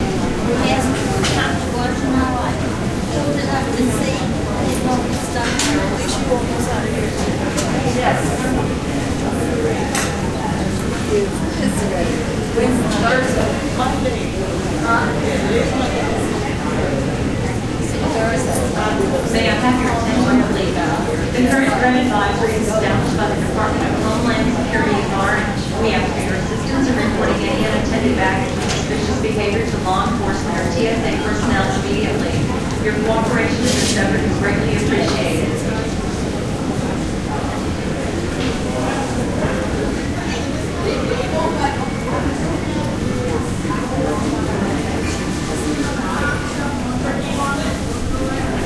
Charleston, SC airport. While waiting a few hours to board my flight home to Chicago, I heard this security announcement a dozen times and decided to record it with my Olympus ls-10.
airport, ambient, announcement, security, tsa